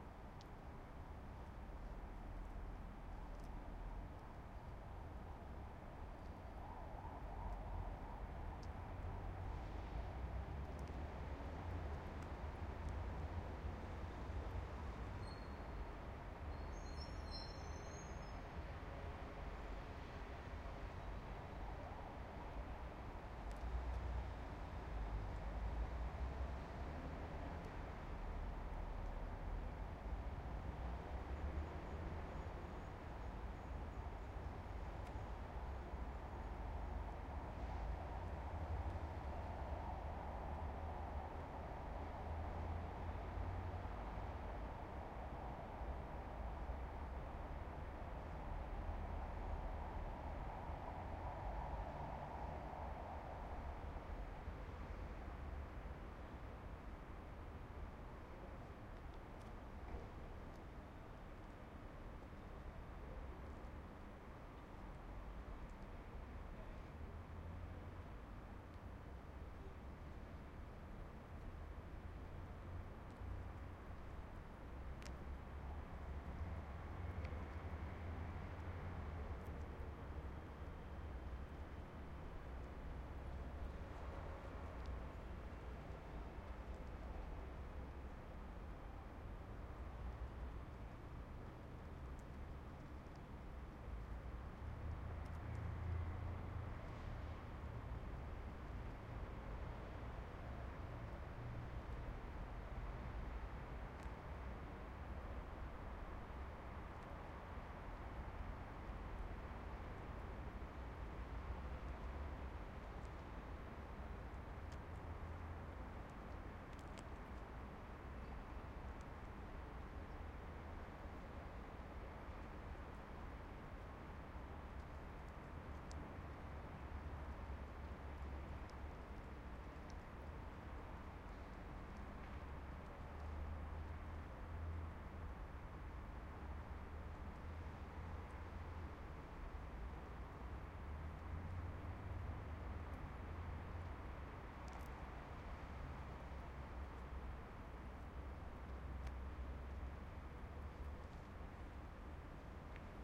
Empty rail station ppl are waiting for train
rail, station